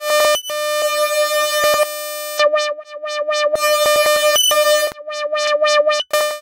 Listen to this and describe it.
YAMBO Paulette 2016-2017 Synthé
Sound created with the frequency of 440 hertz. I added the Paulstretch and WahWah effects, changed the speed and increased the treble at some points
android, robot, synth, treble